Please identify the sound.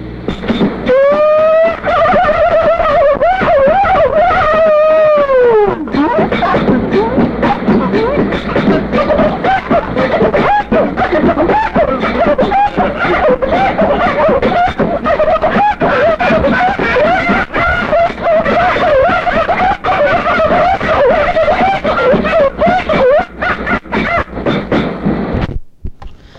This defies description, and even more defies justification. But I can tell you it involved sound-on-sound using built-in speakers and mics of multiple portable cassette decks, which is why it sounds horrible.